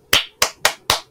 4 claps for Deep in the Heart of Texas song
sing famous kid songs with Alex Nevzorov's clapping sounds from right here!